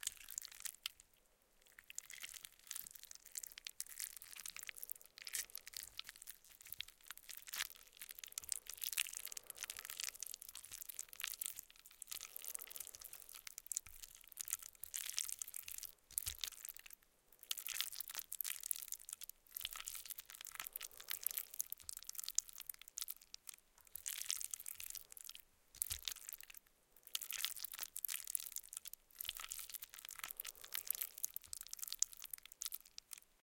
Zombie Cuisine
This is a foley recording I did for a cinematic nature documentary. In the scene you see lions pulling the guts out of a recently killed bison. As the sound assistant didn't want to get too close, I simulated the sound by mushing up a plate of Lasagne in front of a pair of Rode NT5's. Very suitable for Zombie movies - Have fun!
sfx, mush, fx, disgusting, damp, prey, masticating, zombie, sick, efx, foley